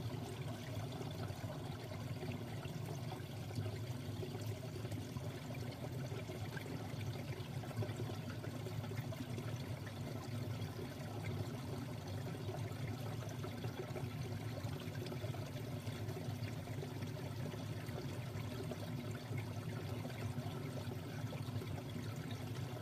Water Flowing into Underground Sinkhole
Water flowing from an above-ground stream into a sinkhole leading to an underground cave. Recording captures the gurgling of water running over rocks along with the deeper, bassy rumble of the underground chamber.
water, underground, snow-melting, field-recording, running, brook, forest, trickle, cave, rocks, snow, sinkhole, stream, river, flowing, subterranean, limestone, reverb, babbling, cavern, creek, gurgling, gurgle, nature, trickling